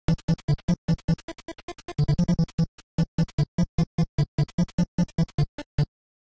8-Bit Boss appearance
This is my new 8-Bit-Boss-appearance Sound. It can be used
to clarify a boss appearance.
This Sound was made with FamiTracker.
8-bit, boss, c64, chiptune, game, nintendo, retro, sega, video